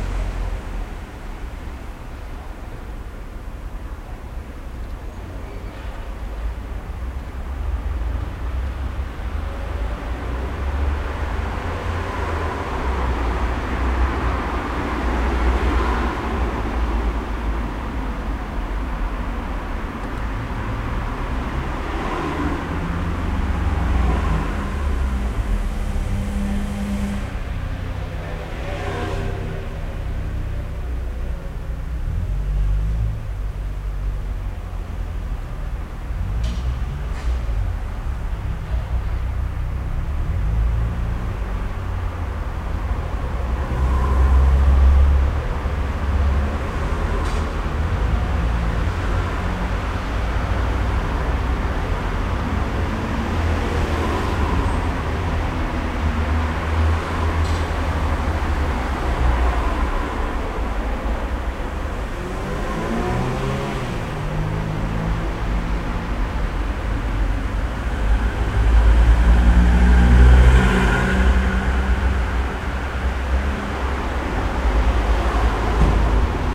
around the yellow Ferrari pt. 1
there supposed to be a yellow Ferrari always parked somewhere near the corner Moltkestr./Roonstr. right here in Cologne. my plan was to look for it once a week and record the ambience. i only made it once, recorded with peak and my iBook's built-microphone. i never met the car's owner and i don't know where it's gone now, since i have not seen that car since then..
car, cologne, field-recording, gunk, street, traffic